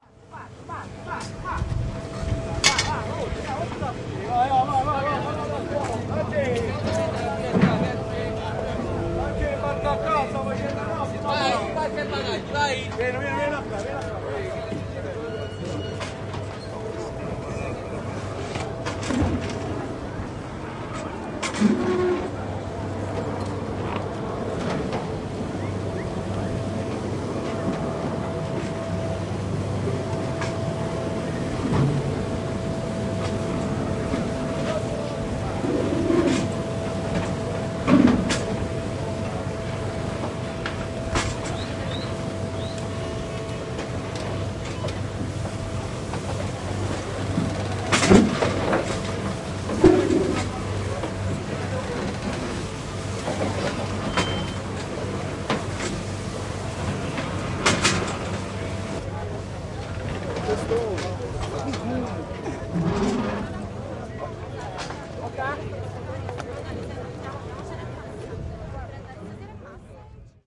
Napoli Molo Beverello hydrofoil workers and passengers
windshield
boarding passengers. workers in background
field-recording, capri, italy, hydrofoil, napoli, passengers, port, boat